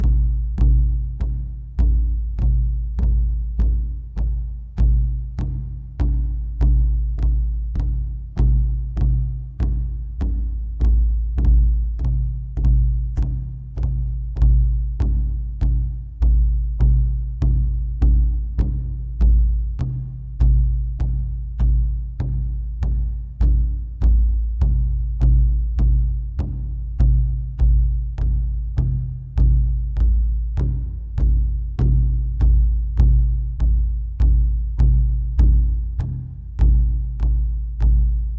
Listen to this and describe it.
NATIVE DRUM LOOP B 16BARS 100BPM
A native American hand drum playing a regular beat for 16 bars at 100BPM. Source was captured in two passes for left and right with a Josephson C617 microphone through NPNG preamp. A little reverb has been added.